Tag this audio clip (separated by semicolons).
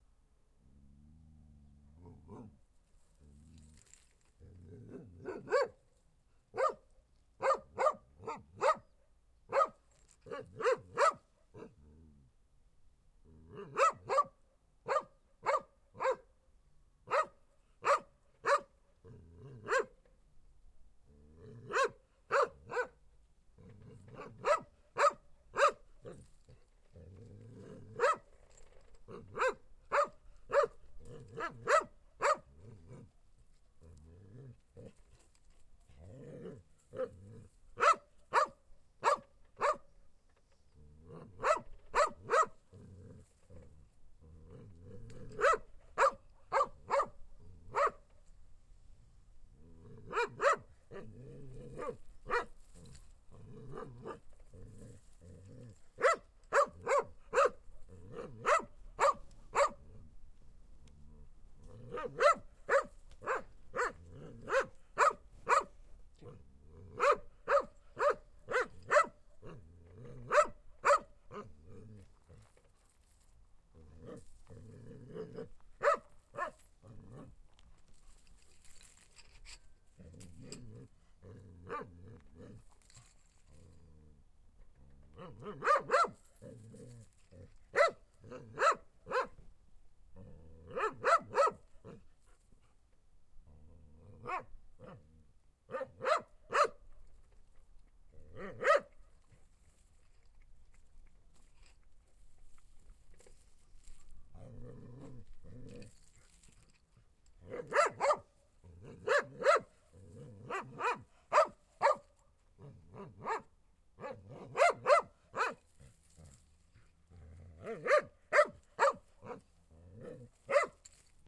bark; barking; barking-dog; dog; dogs; medium-distance; woof